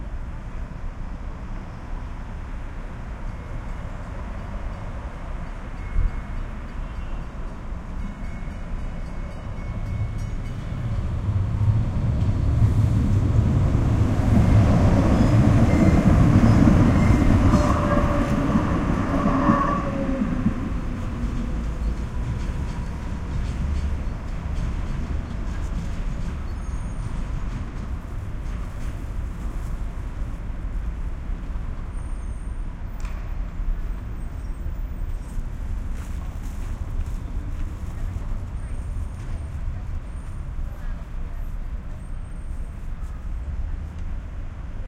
train-by1
Street noise of Coolidge Corner with a T train passing by.Recorded using 2 omni's spaced 1 foot apart.
boston, mbta, subway